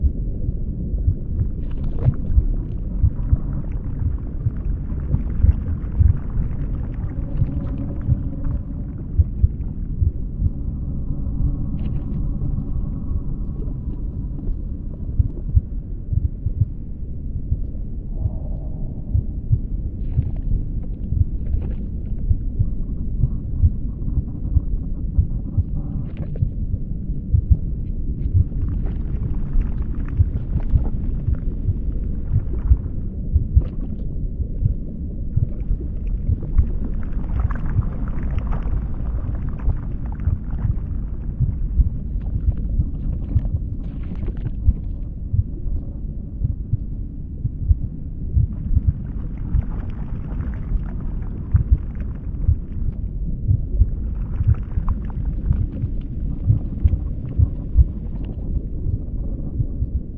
Ambience MonstersBelly 00
A dark and creepy ambience loop sound to be used in horror games. Useful for being trapped inside a giant evil monster, or having a nightmare.
ambience, epic, fantasy, fear, frightening, frightful, game, gamedev, gamedeveloping, games, gaming, horror, indiedev, indiegamedev, rpg, scary, sfx, terrifying, video-game, videogames